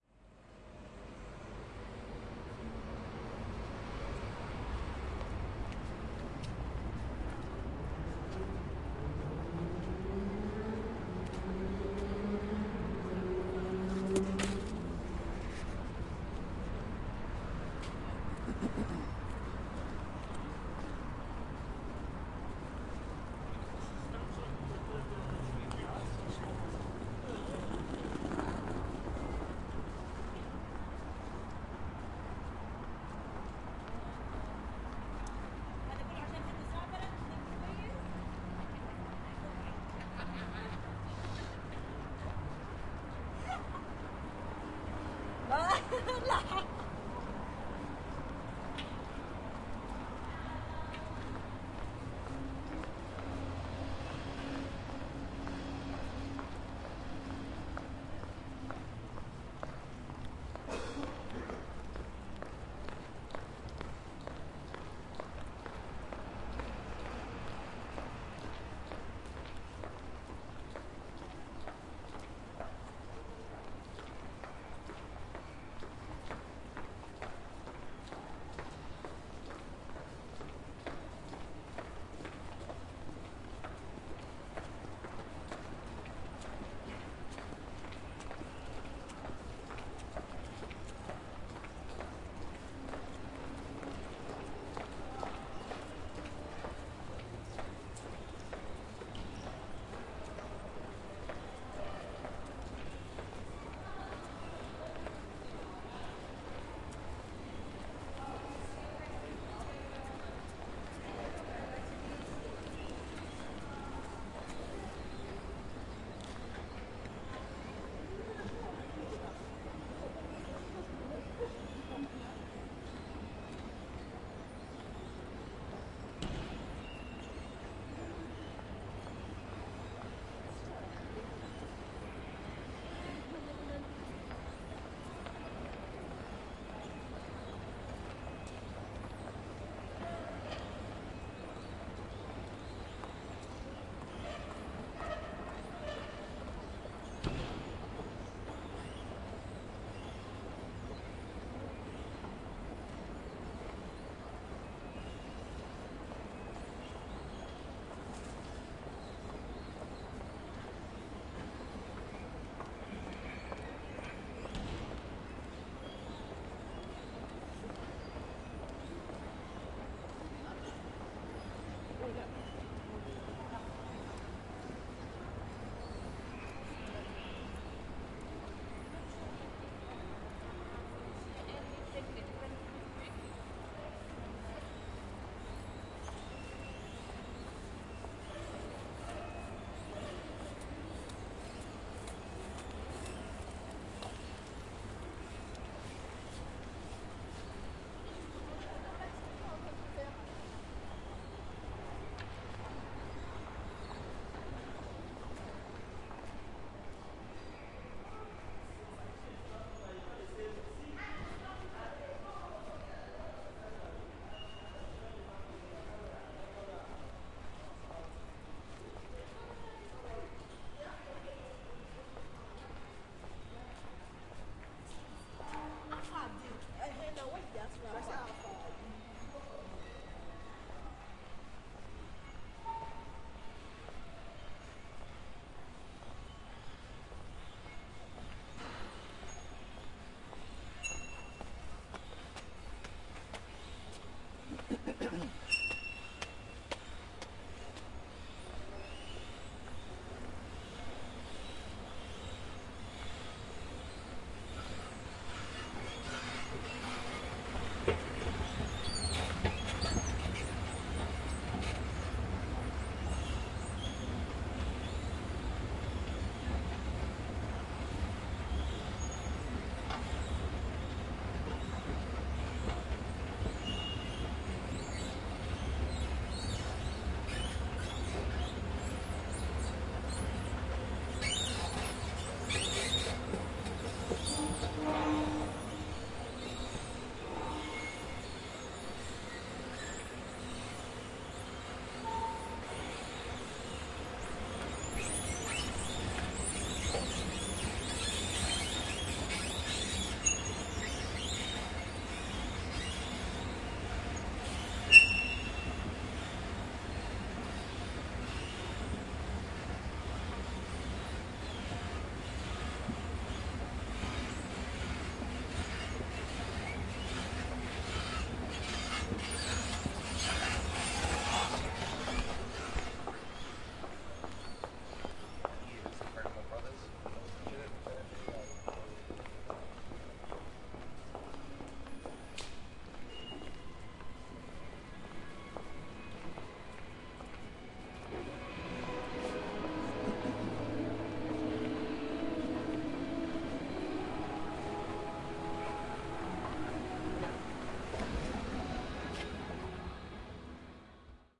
Outside a shopping centre, small crowd, people passing, approaching a squeaky travelator, taking a ride up then down again, going inside mall
escalator, shopping-centre, outdoors, Street